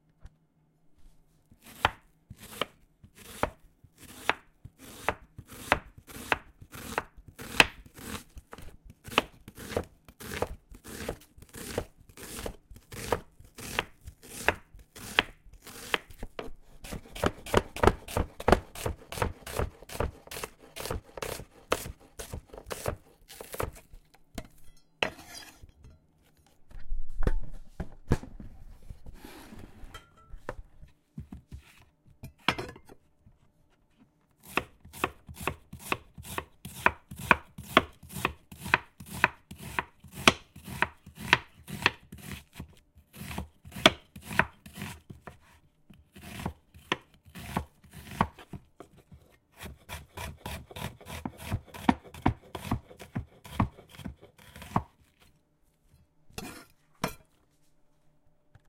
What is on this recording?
Slicing and chopping onions on a wooden board with a stainless steel knife
Recorded with Zoom H4N , unprocessed
board, chop, chopping, cooking, food, kitchen, knife, onion, slice, slicing, vetegales, wood